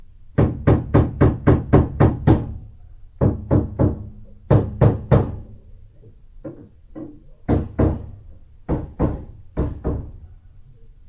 striking a nail to a wall 02
hammer, hit, impact, knock, nail, strike, wall, wood